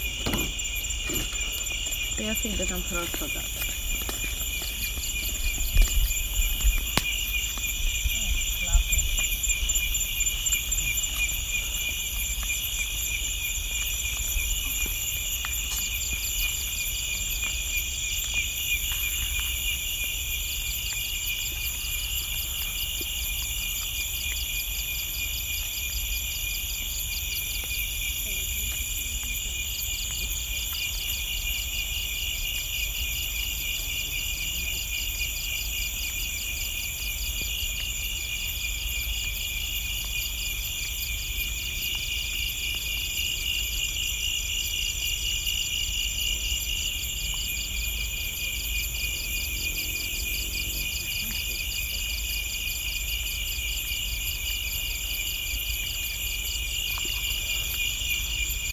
Koh mak field night
Night walk on Koh Mak island in Thailand
field, ambient, crickets, kohmak, thailand, nature, evening, night